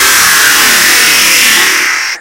Squeaky Jumpscare
A jumpscare sound made by paulstretching a recording of me screeching in Audacity.
Horror, Scary, Scream